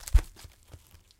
Some gruesome squelches, heavy impacts and random bits of foley that have been lying around.
blood, death, foley, gore, mayhem, splat, squelch